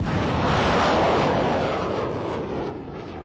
mim-23 hawk missile launch 1
Specific details can be red in the metadata of the file.
rocket, attack, military, launch, fight, start, army, missile, agression, woosh, war